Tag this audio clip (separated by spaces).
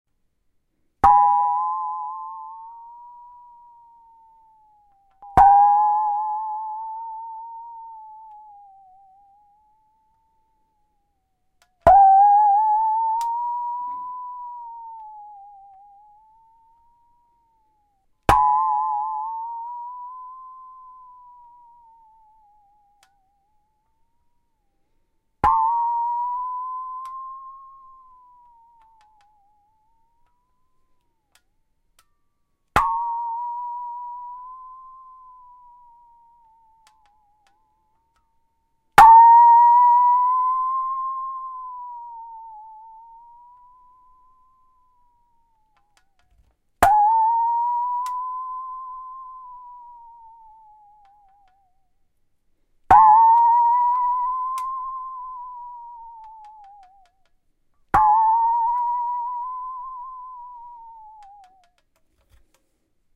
Saw Hand not-bowed slow-attack